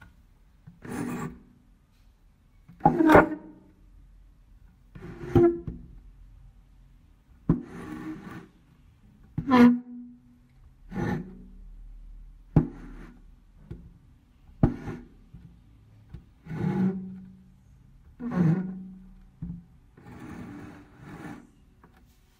The sound of table when pushed or moved from its place.